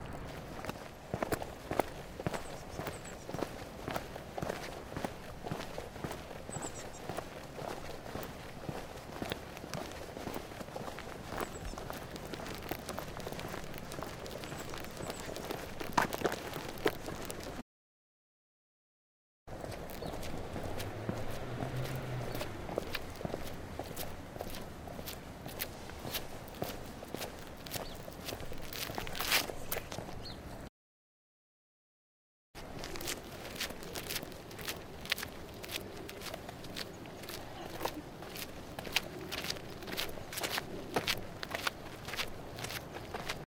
Steps Park
Person walking on gravel and tarmac. Some birds and traffic in the background.
Zoom F8, Røde NTG4, Blimp
walking, footsteps, gravel, step, feet, steps, spring, park, walk, summer, foot